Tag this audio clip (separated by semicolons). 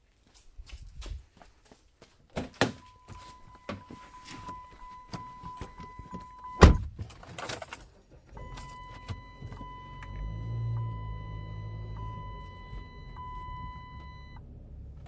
engine; shut; drive; car; start; door